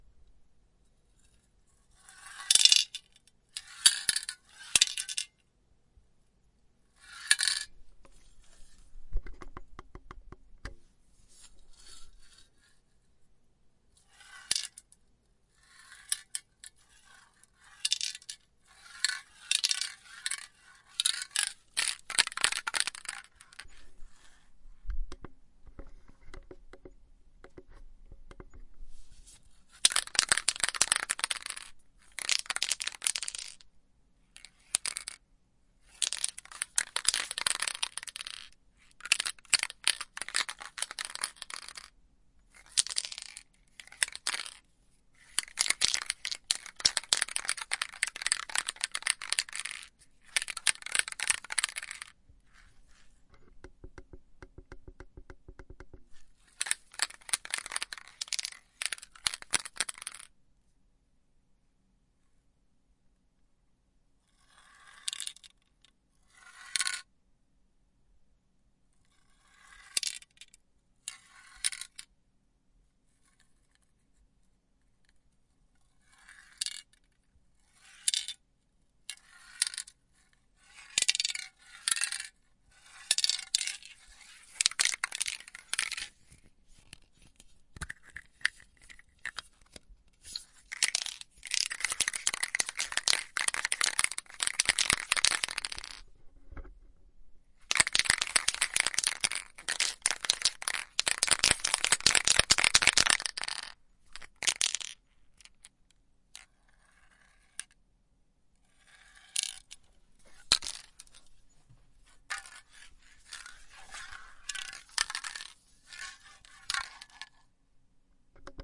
spray can noise
a graffiti spraycan moving, you hear the little metal ball inside rolling around.
recorded with zoom H4N
noise, graffiti, rolling, can